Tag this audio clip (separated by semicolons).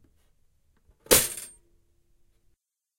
kitchen; toaster; up